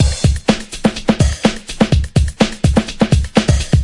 2 bar, 125bpm breakbeat